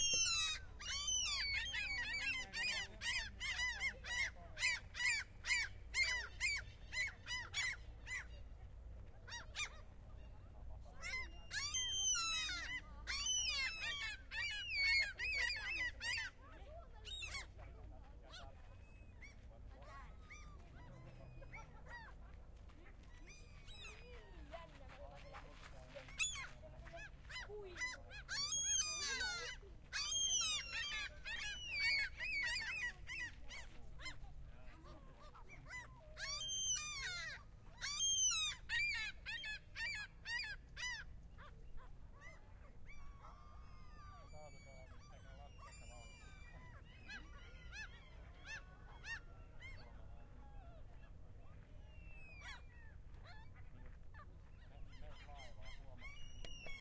noisy seagulss people
field-recording, people, seagulls, voices, nature, birds, ambience